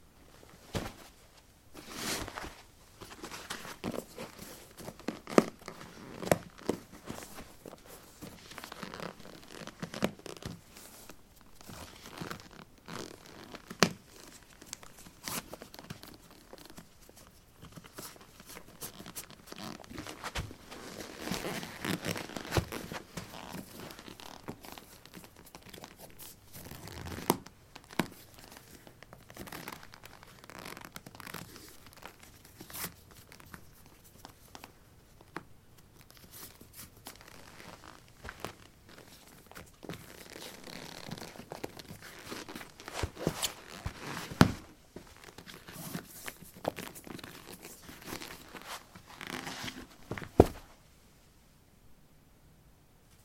carpet 18d trekkingboots onoff
Getting trekking boots on/off. Recorded with a ZOOM H2 in a basement of a house, normalized with Audacity.
footstep, footsteps, steps